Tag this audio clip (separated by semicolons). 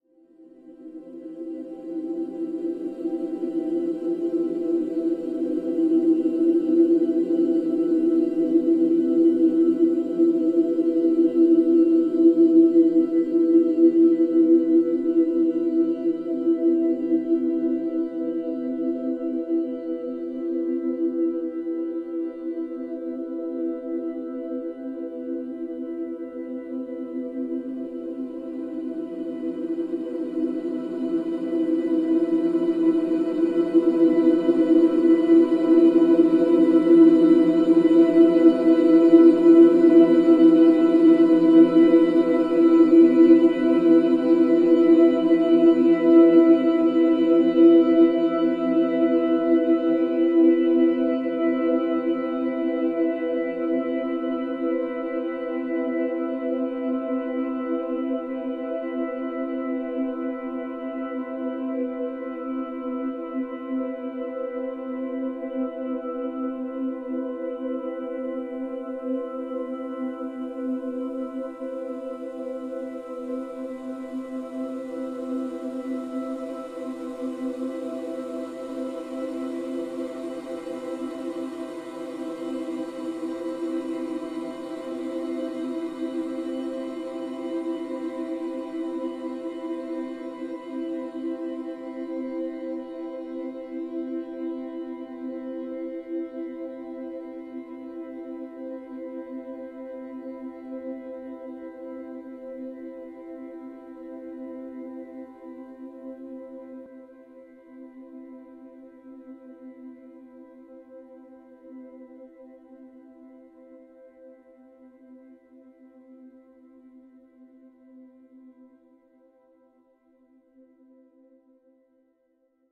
lonely; drone; beautiful; sad; ambient; evolving; smooth; dreamy